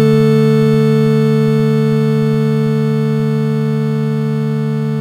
Superness 9,0.5,0.5,2 n=3 real
Crude pianolike sound with Superness object
synthetic, superformula, piano, additive, synthesis, harmonics, superellipse